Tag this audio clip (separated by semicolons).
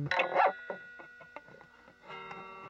orange; guitar; distortion; electric; amplifier; mini-amp